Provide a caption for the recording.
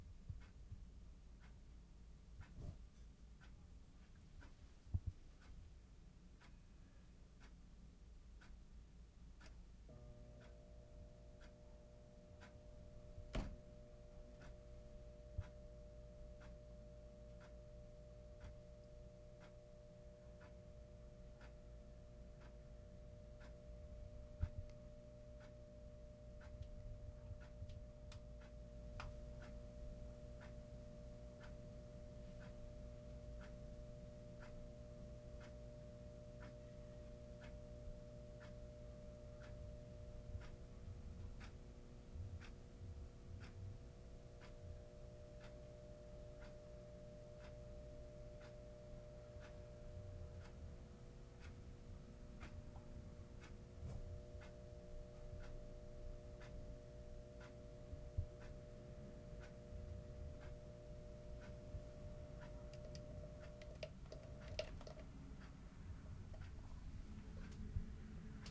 clock ticking electirc buzz
ambient, buzz, field, recording, ticking